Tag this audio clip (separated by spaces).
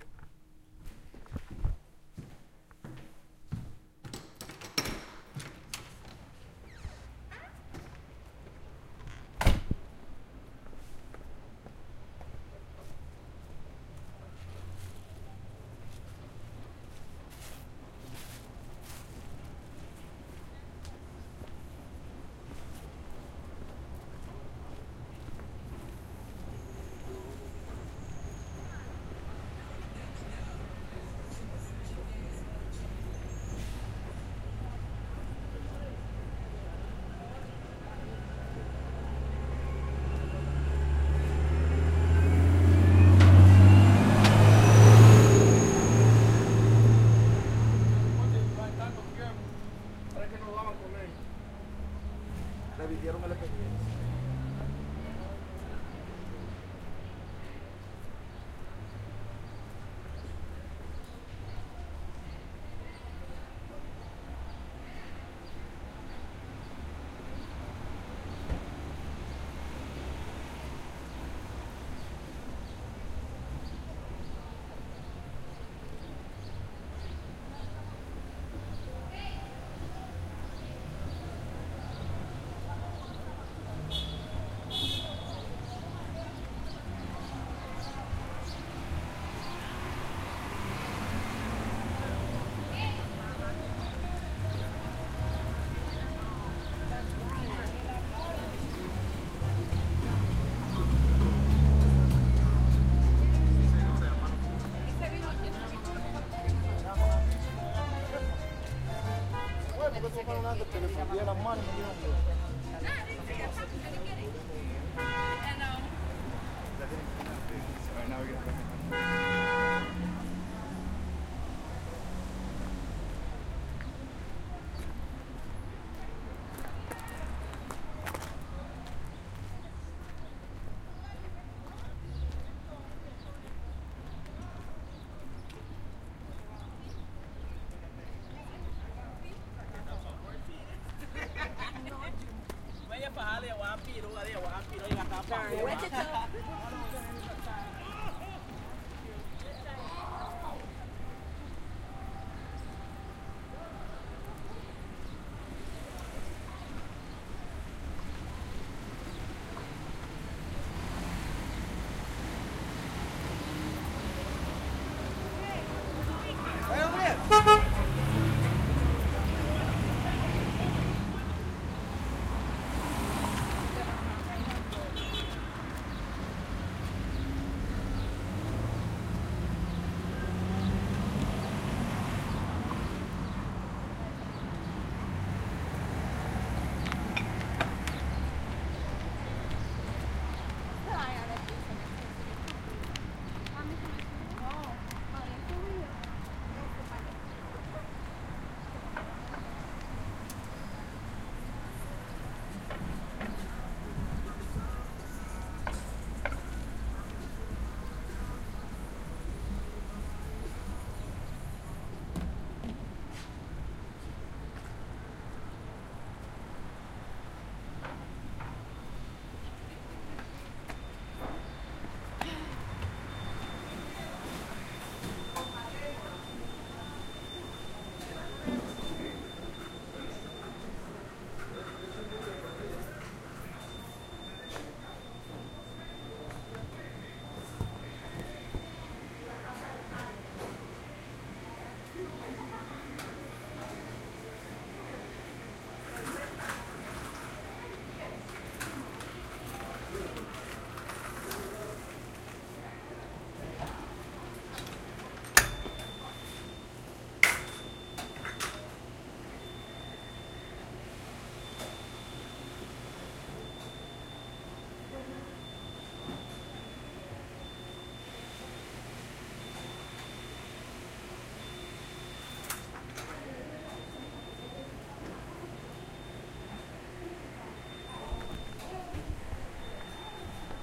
walking multicultural Cars Brooklyn laundromat